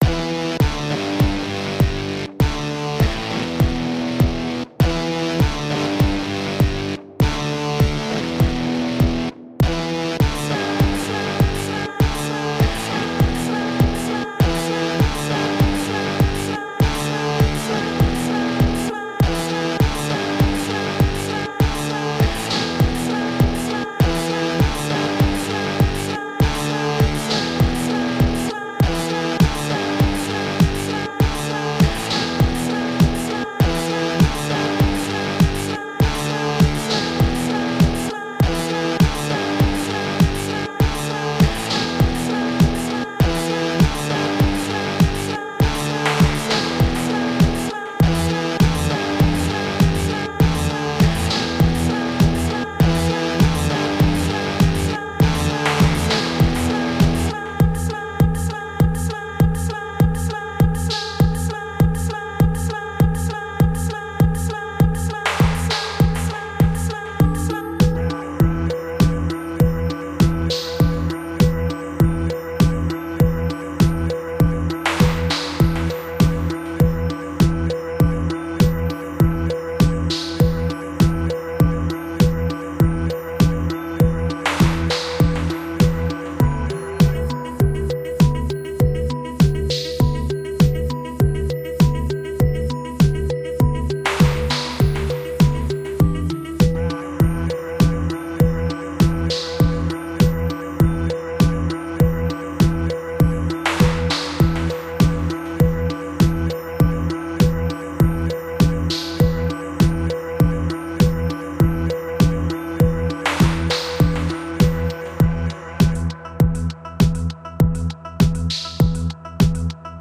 Epic intro guitar -
originalelectronic, music